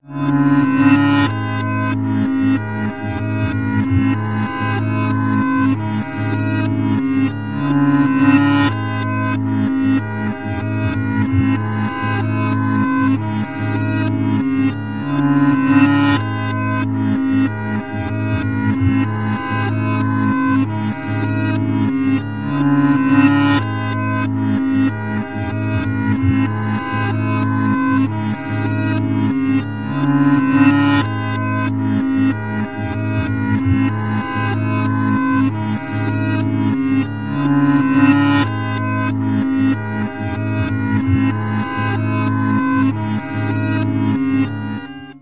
A harmonica, reversed/layered/looped/effected, at various speeds.